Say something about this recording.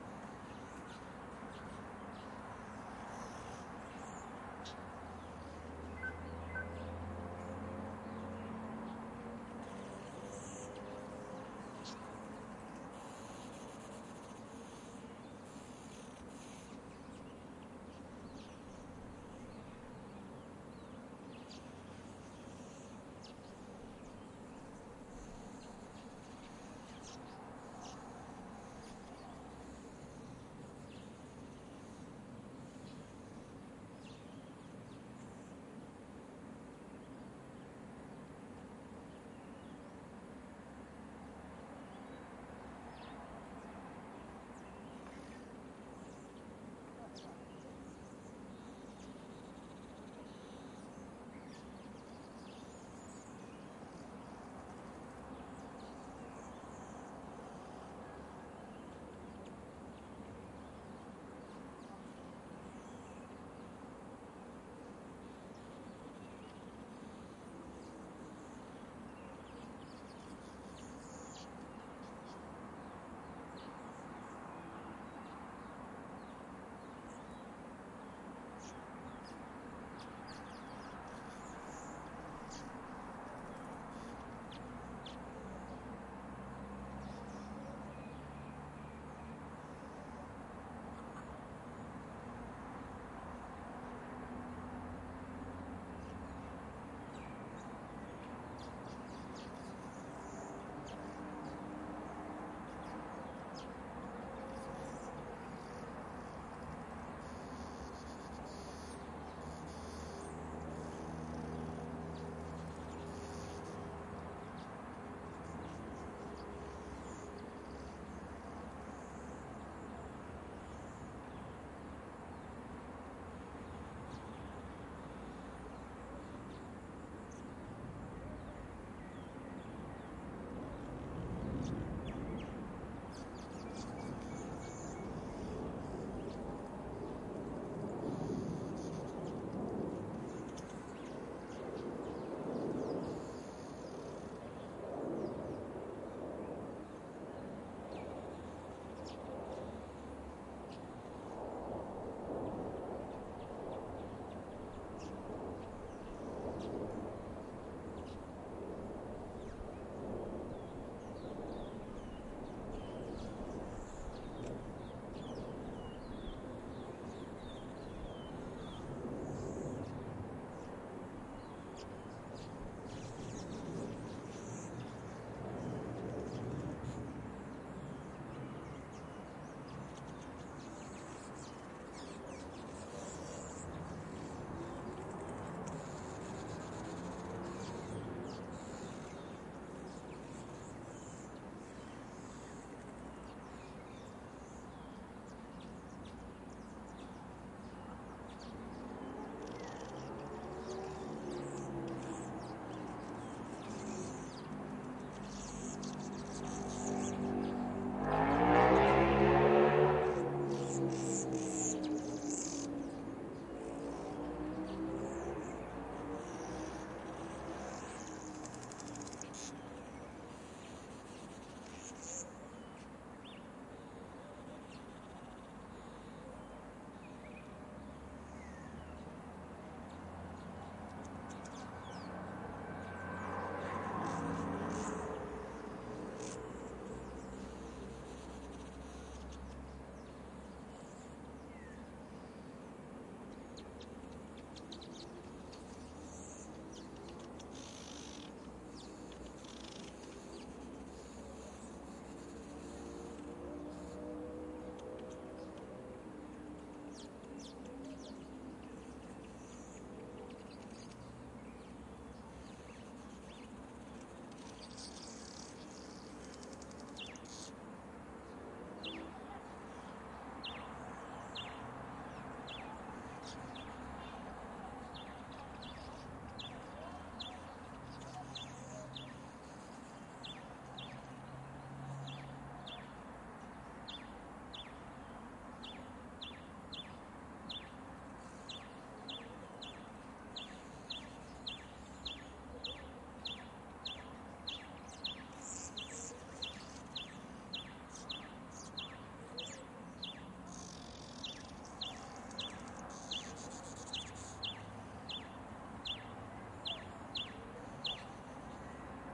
Ambience RUC Car-Park Afternoon Birds Distant Traffic Pass-by Motorbike External Air Suburban daytime
Ambient recording of a car park in a suburban neighbourhood, close to a main road in Johannesburg South Africa.
You can hear mainly birds and distant traffic, including one rather loud motorbike pass-by.
Recorded with the internal mics on a Tascam DR-07 and very slightly cleaned up in iZotope RX.
Ambience, Car-alarm, Car-Park, daytime, Distant-Traffic, External, Motorbike, Pass-by, Suburban